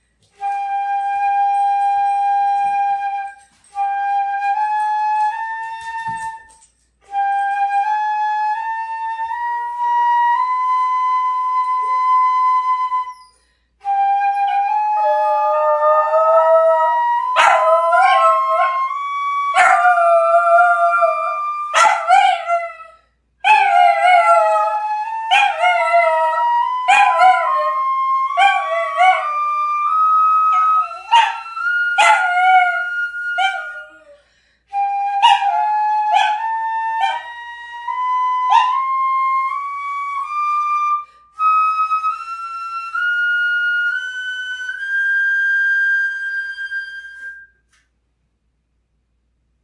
Dog and Flute1
This is a small dog yelping along with a flutist warming up with scales.